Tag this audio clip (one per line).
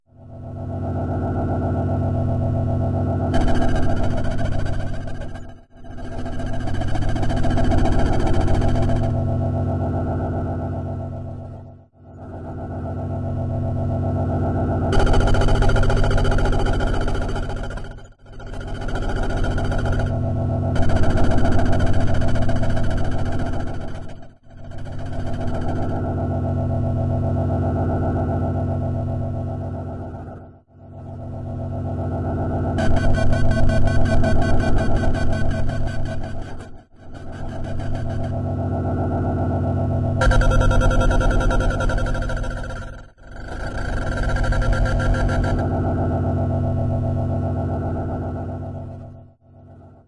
Ambience
Factory
Machine
Machinery
Mechanical
Synthetic